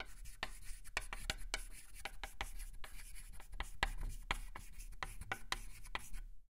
Writing with chalk on a chalkboard from the left mic to the right.